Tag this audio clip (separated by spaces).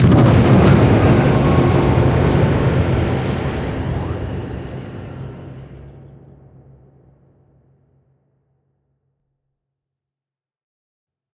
buzz buzzing drill engine factory generator hit hum impact industrial machine machinery mechanical metal mill motor operation power run running saw sfx sounds stinger